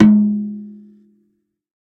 This is an 8" tom drum off the Mapex Mars drumkit, designed to be used in a General MIDI programme 117 (melodic tom) sampler.